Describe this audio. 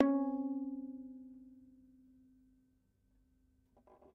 Viola Des plucked
I recorded a viola for a theatre project. I recorded it in a dry room, close mic with a Neumann TLM103. Some samples are just noises of the bow on the C string, then once in a while creating overtones, other samples contain some processing with Echoboy by SoundToys.